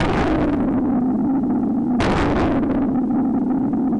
sherman shot bomb18 atmosphere
I did some experimental jam with a Sherman Filterbank 2. I had a constant (sine wave i think) signal going into 'signal in' an a percussive sound into 'FM'. Than cutting, cuttin, cuttin...
sherman, blast, perc, bomb, harsh, shot, hard, massive, analouge, artificial, percussion, filterbank, atmosphere, analog, deep